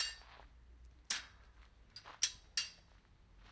church-gate
Opening and closing the gate on a footpath. It leads in to the ruins of a medieval church. Binaural recording, it "flips" from one channel to the other as I turn my head to close it behind me. Recorded on a Zoom-h1.
binaural, church, footpath, gate, latch, path, squeak